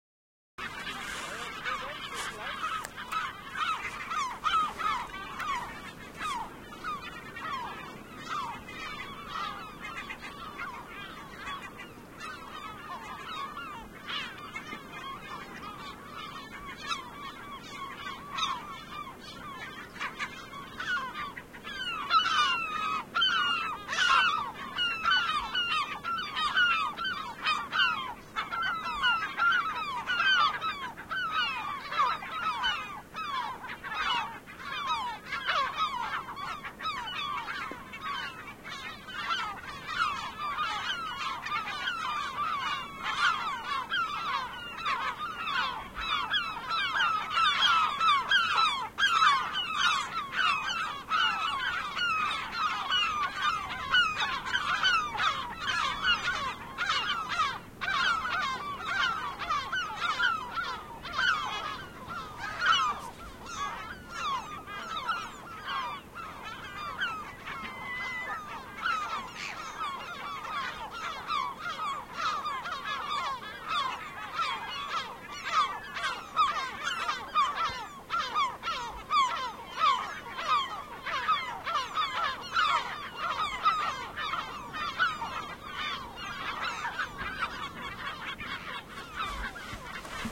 KD4580QX
XY recording ( Aaton Cantar X, Neumann 191 ) of 2 types of Seagulls. The Larus argentatus & Larus fuscus graellsii.
this is the first, rather busy.